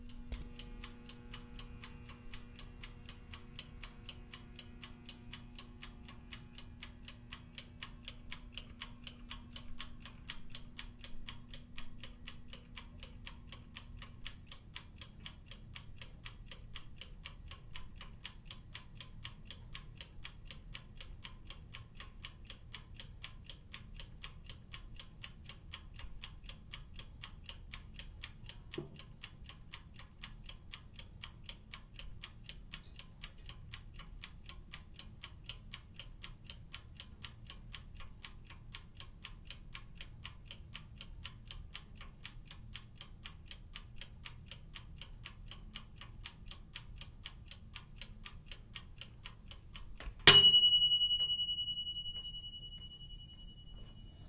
Toaster oven timer and ding
A toaster oven timer ticks down and dings.
alarm, ding, kitchen, time, timer, toaster, toaster-oven